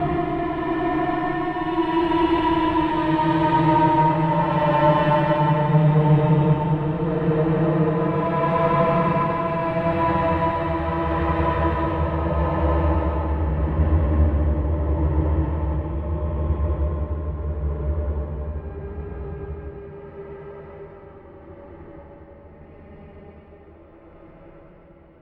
ZERILLO Alexandre 2015 2016 Banshee
Based on the sound of a creaking door. We shortened it to keep the creaking part. It's an alternative version of the "Doorzilla Reborn" with a Paulstretch effect (Stretch : 10 / Resolution : 0.25) and less Tremolo. It is a bit long but I think it's good to create a tense ambience.
Description selon Schaeffer :
Continu Varié
Son cannelé
Harmonique caverneuse, âpre, organique.
Timbre rugueux comme un hurlement d’outre-tombe.
Chevrotant.
L'attaque est abrupte, bien que non violente.
Variation serpentine.
Site